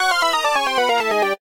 Retro arcade video game descending, lose life tone
Fail, lose life negative musical expression tone for retro arcade game